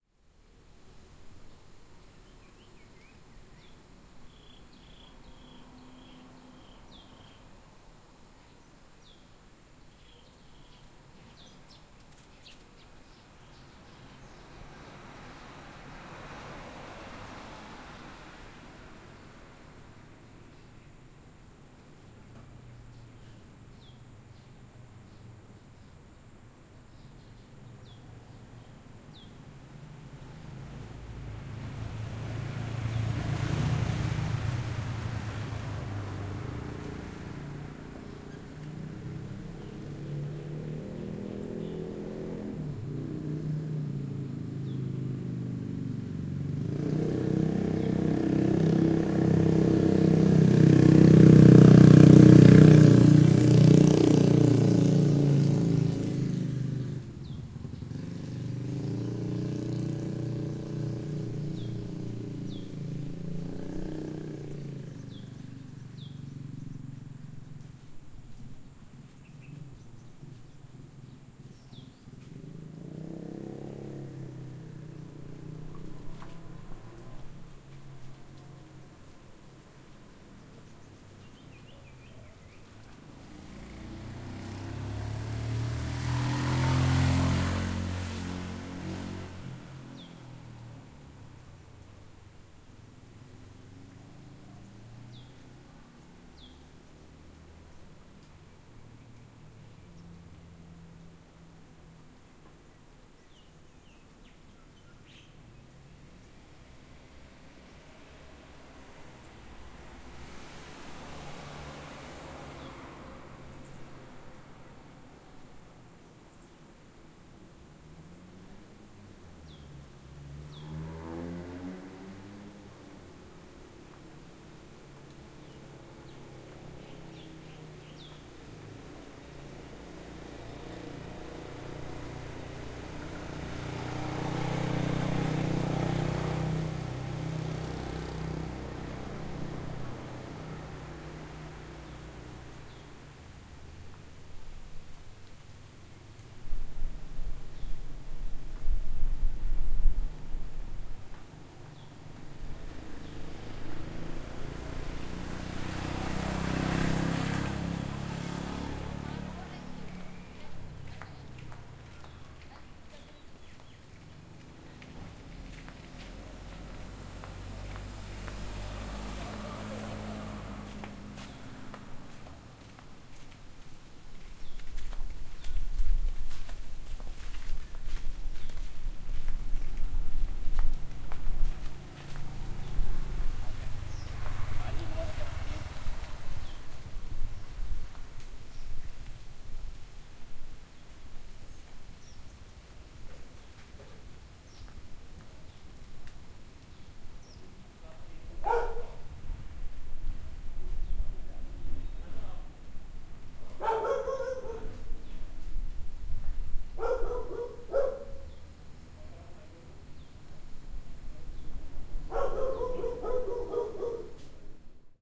Ambience-Rural-Early Evening-Birds Chirping, Light Wind, Leaves on Trees Rustling, Near and Light Traffic, Light Footsteps, Dog Barking
Recorded using Zoom H5